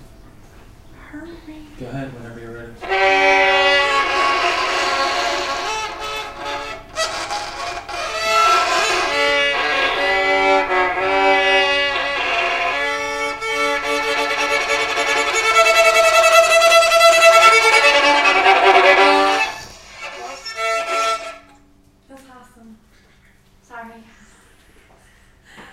I recorded as 2 females and myself took turns playing and torturing a violin.